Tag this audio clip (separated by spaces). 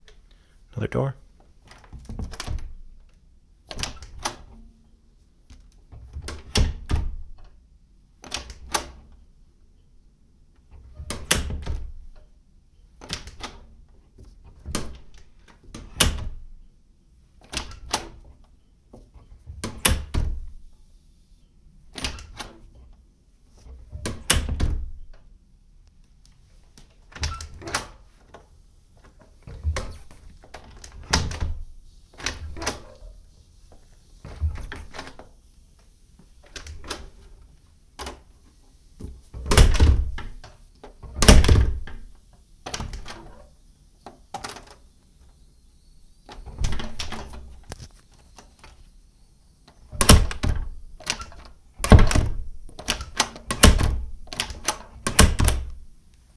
door doors handle metal open shut wood wooden